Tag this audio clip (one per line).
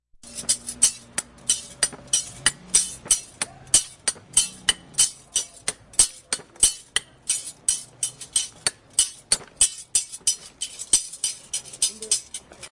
cutting
hardware
scissors
tools
street
sounds